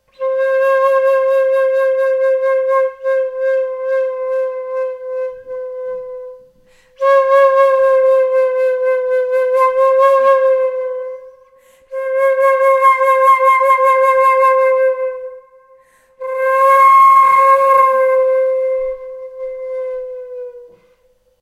Flute Play C - 07
Recording of a Flute improvising with the note C
Acoustic, Flute, Instruments